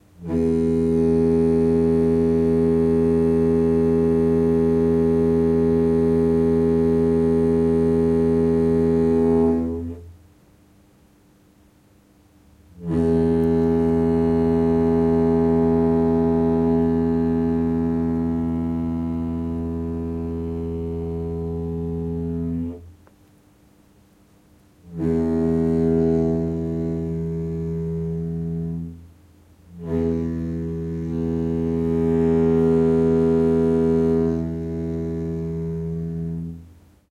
FX air in pipes-horn 090720
A horn-like sound made from air in water pipes. Tascam DR-100.
call, pipe